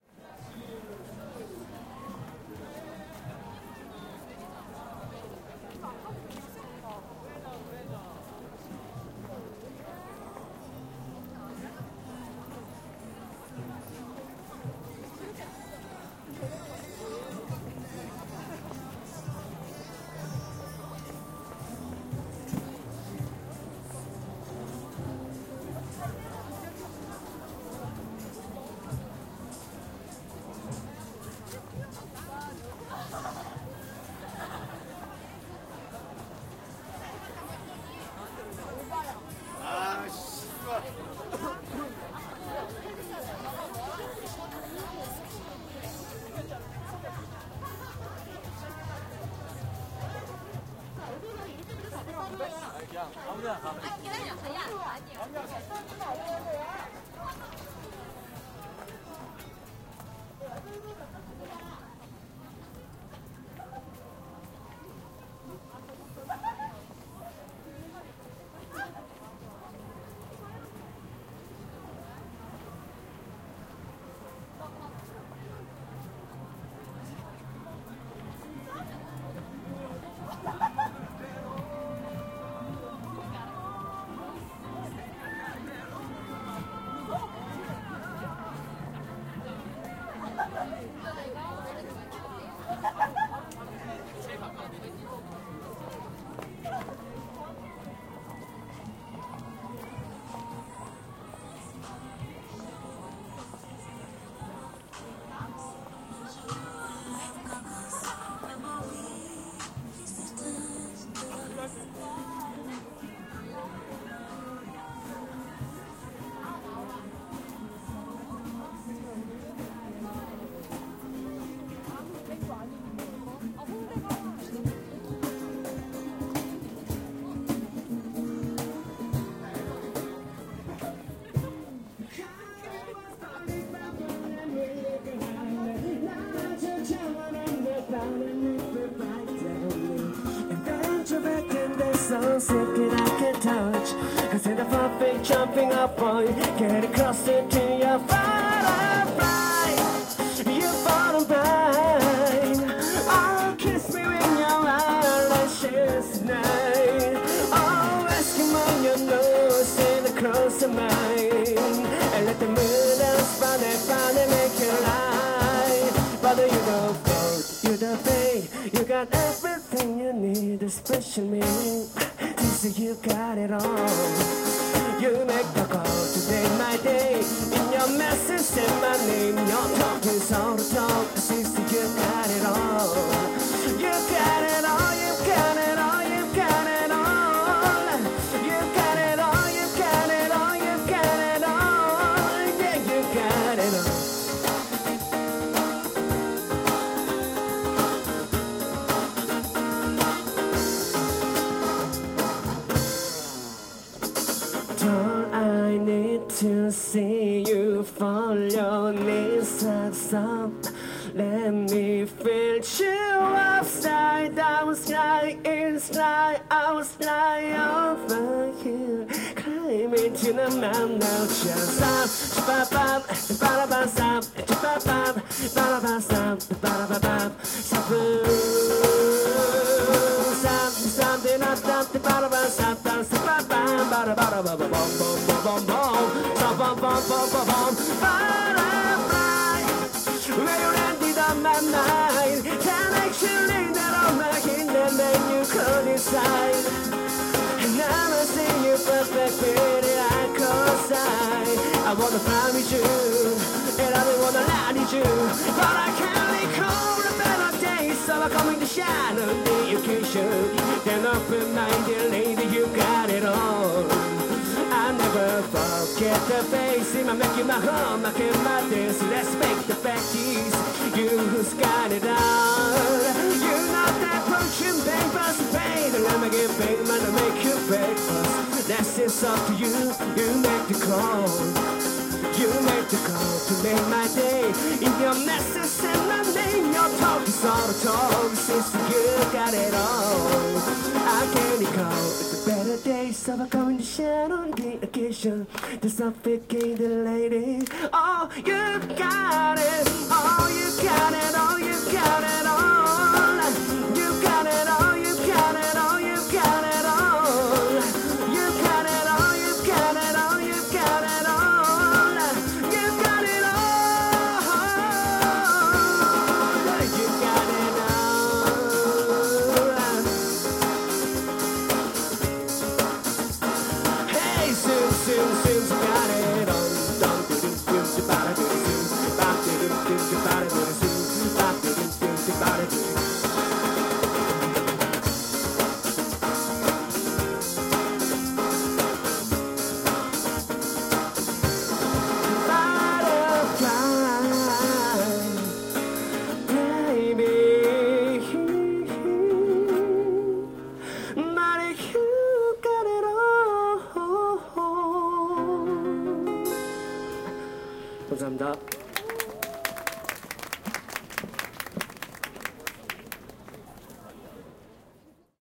0178 Hongdae music 1
People in a busy street. Music from a band in the street singing in English. People clap. Talking in Korean.
20120212
field-recording, korea, music, seoul